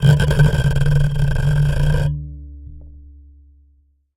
wire scraperelease 6
A small piece of thin steel wire recorded with a contact microphone.
sound, wire, soundeffect, contact, effect, fx, steel, metal, close, microphone, sfx